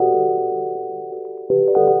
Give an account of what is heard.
I cut a few short clips from some of my projects to submit to a project that I had seen on the .microsound mailing list. It's pretty fun to do this, I will try to garnish more goodies and share them with the world soon!
This is a short, jazzy melodic loop with a Fender Rhodes feel to it. It was synthesized completely using Ableton Operator.